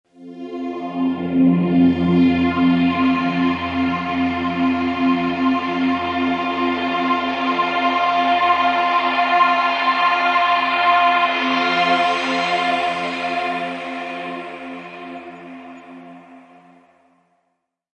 MassAbRezPadF1160bpm
Mass Ab Rez Pad F1 - Massive wavetable synth using Herby and Crude wavetables and spectrum and formant wave fx, noise, feedback, clip, crush, dim expander all inside of the synth. Followed by a bandpass with auto filter, Soundtoys MicroShift, Absynth Aetherizer fx, chorus and eq
Aetherizer, Synth, Processed, 160bpm, 170bpm, Pad, 140bpm, Chupacabra